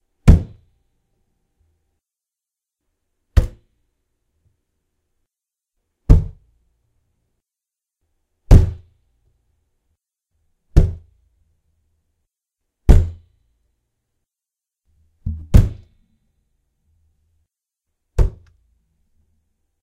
Got a need to use a foley for something hitting the opposite side of a wall, like a ball being bounced off the other side of wall? Here you go. Want to emphasize an actor throwing something down on a wood table? This will work!
This is a thick rag being tossed against a glass window. Rag on the outside, microphone inside for nothing but bass. 7 or 8 samples for some slight variations.